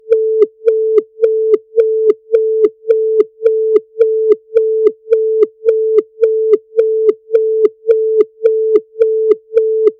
QUIQUEMPOIS Justine 2016 2017 spaceCarBlinker
This is the sound of a space car blinker (can also sound like an alarm). In Audacity I first generated a sound
(Sinusoid, 440 Hz, amplitude: 0,8), then I added a "wahwah" to make it sound like a blinker, then I added a "tempo change" effect to make it more close to the real blinker tempo.
alarm, blinker, car, drive, driving, futurist, space-car, space-car-blinker, vehicle